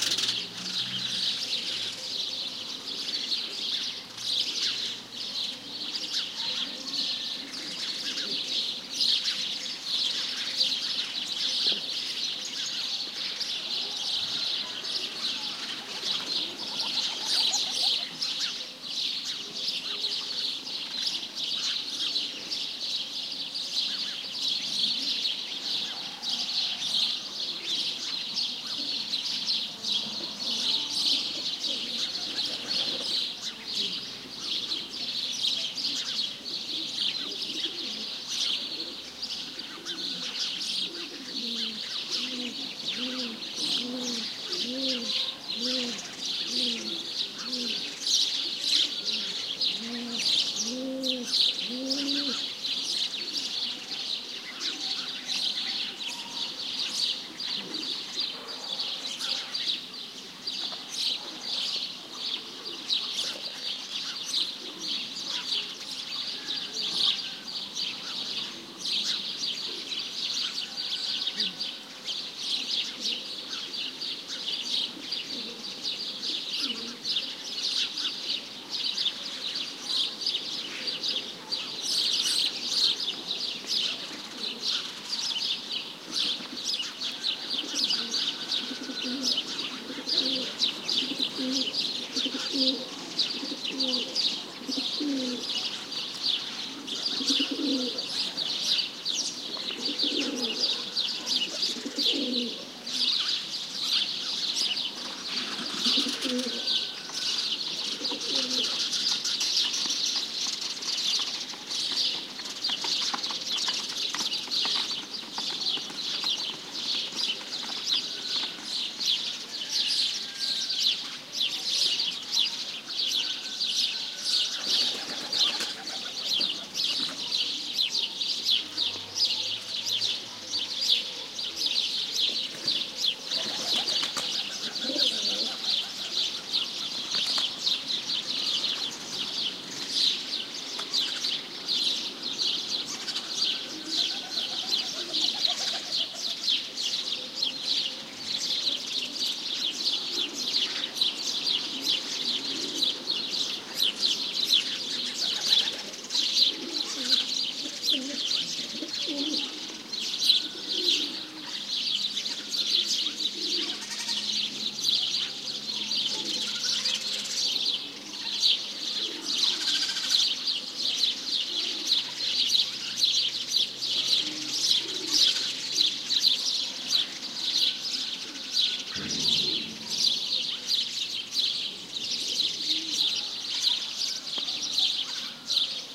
20120429 small village 06
Peaceful spring ambiance with birds chirping and cooing, barking dogs, a distant motorcycle... Recorded in the little town of Paymogo (Huelva province, Andalucia, S Spain) using Frogloggers low-noise stereo pair (BT 172-BI), FEL Battery Microphone Amplifier BMA2, PCM M10 recorder
field-recording, village, Spain, country, ambiance, House-sparrow